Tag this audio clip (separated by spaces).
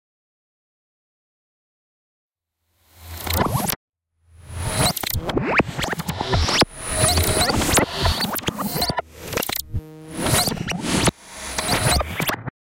aspiration reverse sound speed time travel vortex